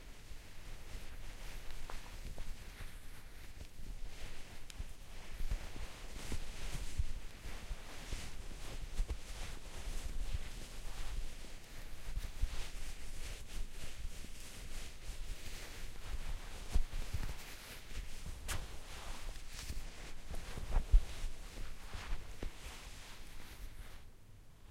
rustle.Scarf 1
recordings of various rustling sounds with a stereo Audio Technica 853A
cruble, noise, paper, rip, rustle, scratch, tear